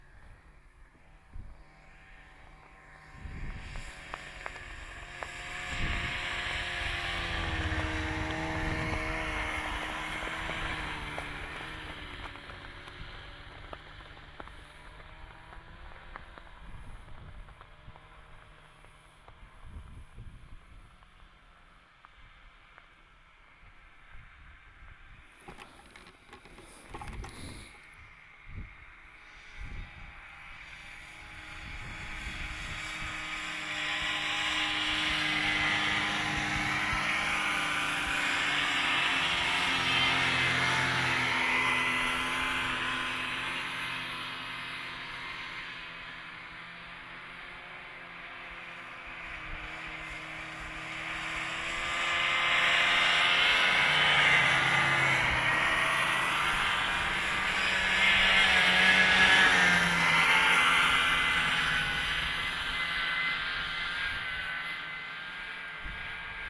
snowmobiles pass by nearish
pass, snowmobiles